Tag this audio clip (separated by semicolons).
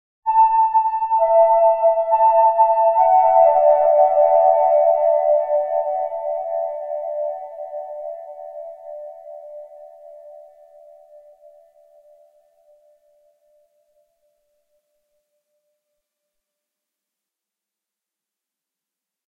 Amazing; Autumn; Birds; Cars; City; Country; Countryside; Cry; Day; Ding; Field-recording; Forest; Free; Heaven; Nature; Park; Public; Ride; Road; Summer; Trains; Travel; Wind